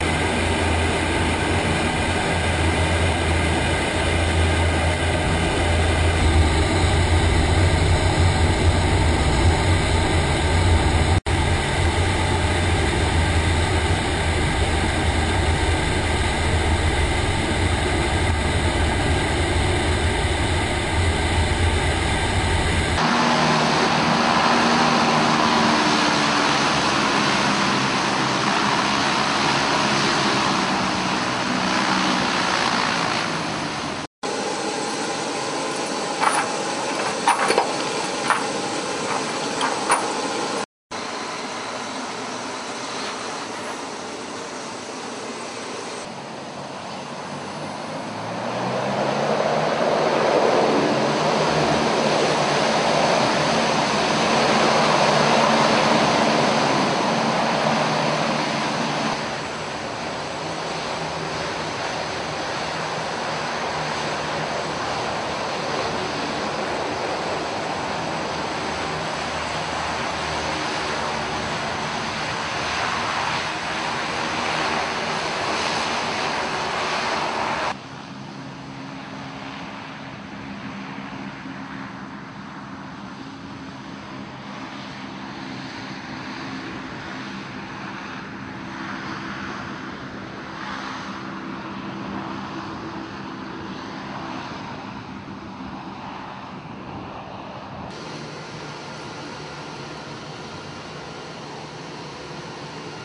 LCAC Air Cusion Hovercraft
Unmanned Combat Air System (UCAS) test.